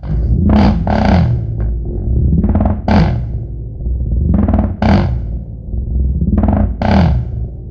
Guitar Noise Slice
Other than cutting, slicing-- no effects were applied. Guitar.
Effects, Effect, Guitar